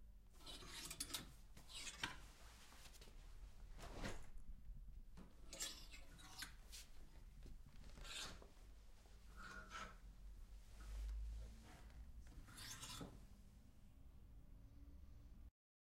clothes hanger closet